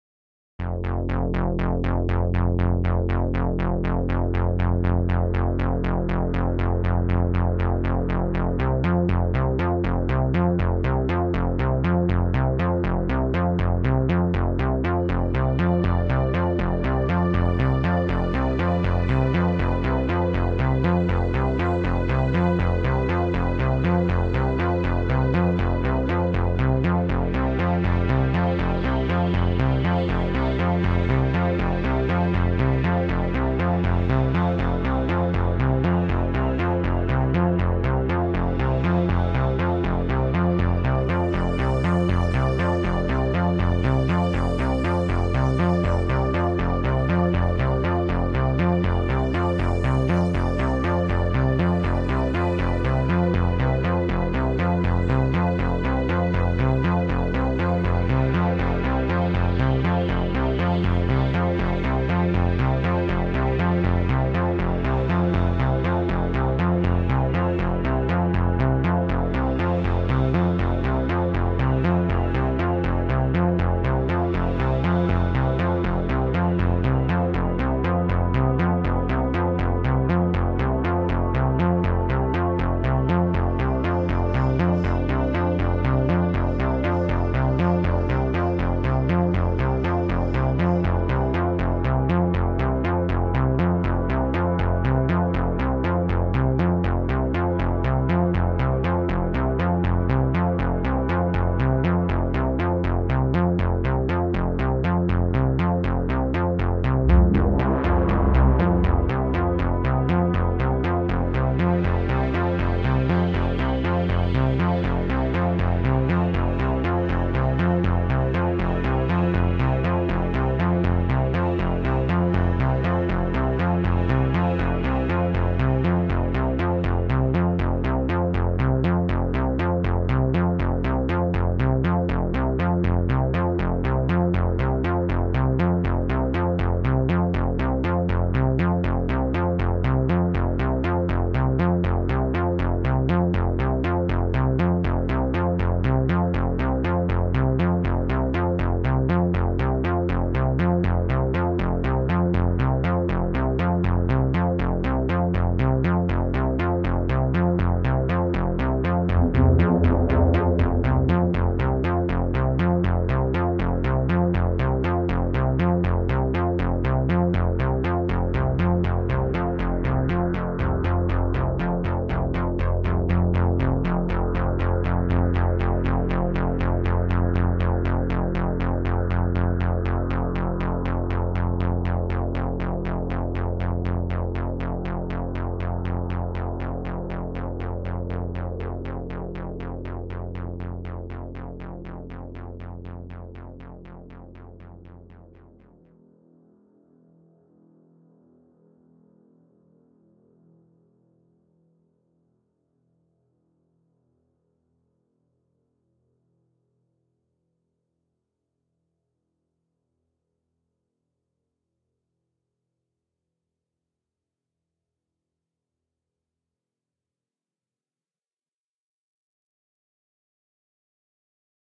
Space chase ambient music
ambient, commnication, cosmos, dream, effect, fiction, fight, future, laser, music, science, sci-fi, space, spaceship, speed, stars, synth, tension, weird